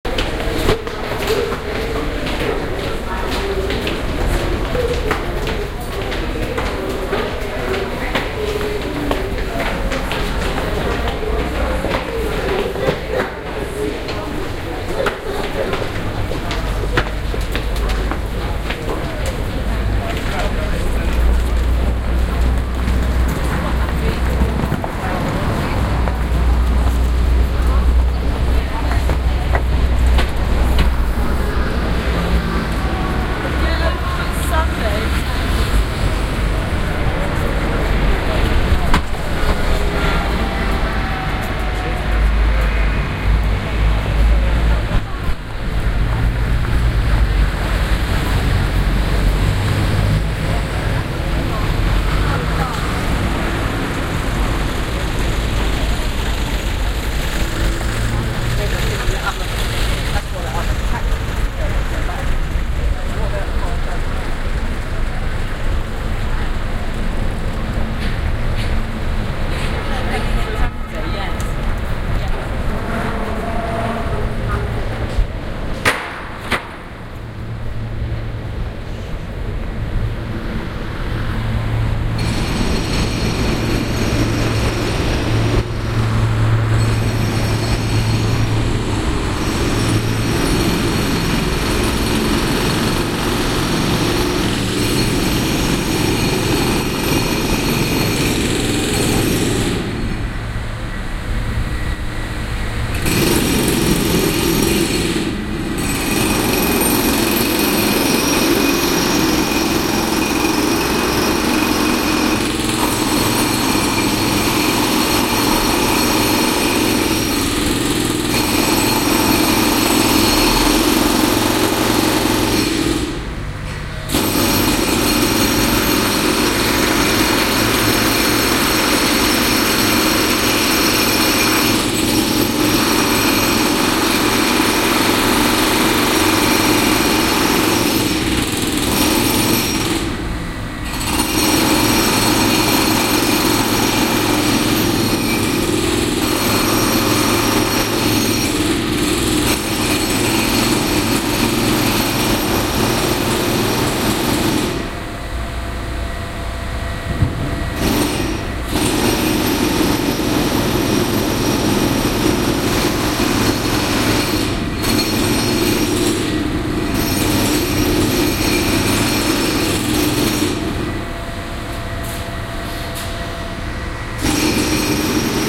Bond Street - Roadworks
ambiance, ambience, ambient, atmosphere, background-sound, city, field-recording, general-noise, london, soundscape